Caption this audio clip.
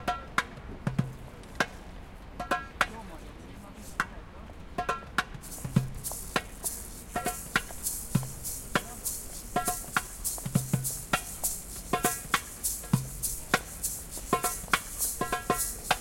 Street didgeridoo cut 2
Capture this sound by ocasion. Group of people was playing on street in Kiev, Ukraine. They are cool )
bongo, didjeridu, etnic, field-recording, group, indigenous, music, street